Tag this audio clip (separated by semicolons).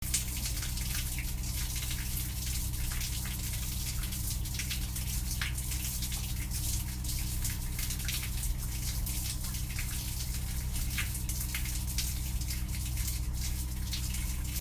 water; running; rain